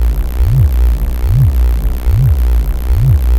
bassline newater
I want to incorporate this into my next song. Hopefully it will work at around 98 bpm. This one is not filtered compared to the one that has "deeper" in the title. More noise in this here version.
bass, throbing, pulse, bassline, riff, pulsing, wah, phaser